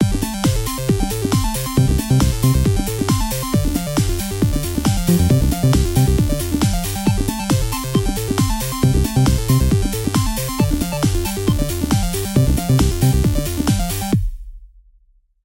Funny little colored teddy bears dancing over the rainbow at the dance floor of electronic madness.
electro, electronic, fun, funny, loop, music, synth